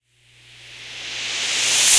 High Reverse Impact
Higher reverse impact with electric overtones good for a ramp up.
electric, impact, ramp, reverse, up